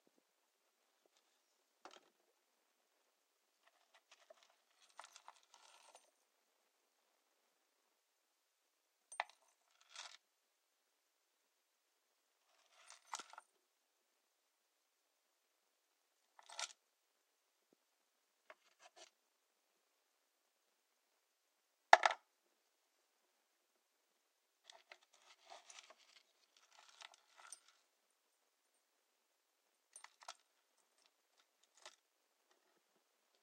Jewelry Box and Necklace
a mono recording of a gold chain being taken out of a wooden box.
close, open, chain, jewely, box, necklace